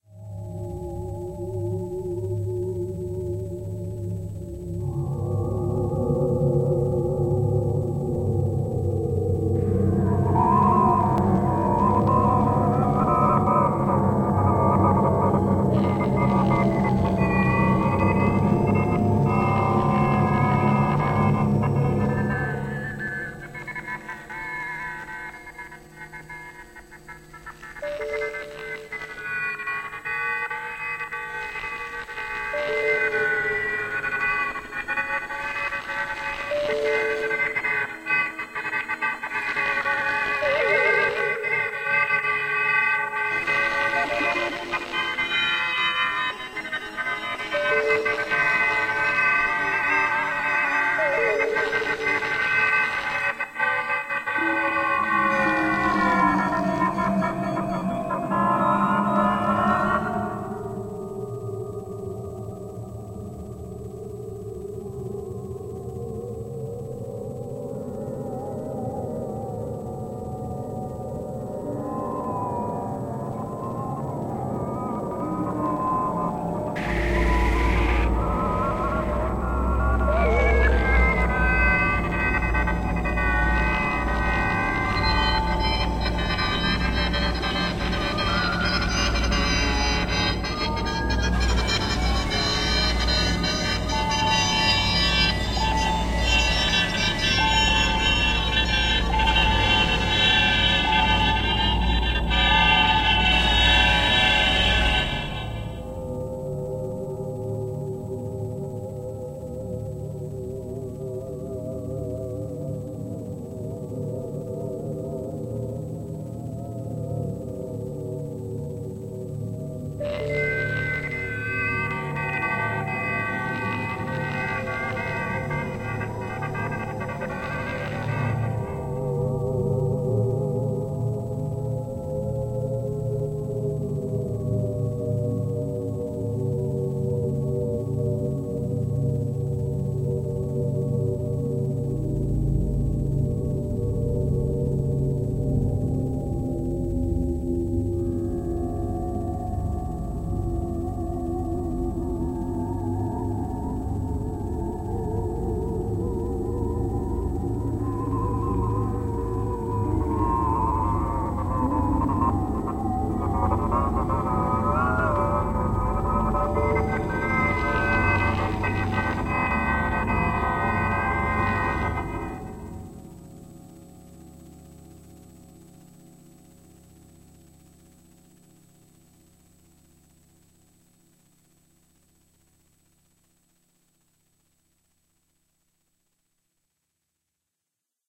Made with Roland Jupiter 80.